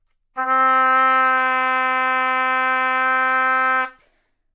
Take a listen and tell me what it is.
Oboe - D4 - bad-pitch-bad-timbre-notune
Part of the Good-sounds dataset of monophonic instrumental sounds.
instrument::oboe
note::D
octave::4
midi note::50
good-sounds-id::8086
Intentionally played as an example of bad-pitch-bad-timbre-notune